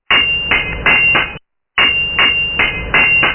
Sword sound
The sound can be used with the Ben-10 - Vehicle force. It is true, this can be a classic fighting scene sound effect to use in the movie.
fighting, clashes, medieval-age, battle, field-recording, swords, war, sword-fighting, sword, sword-battle, crashes, war-related, middle-age, swordfight